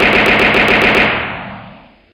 Machine Gun Shots Fast
I used the same sound as the slower machine gun burst to make a faster one. Can be easily looped.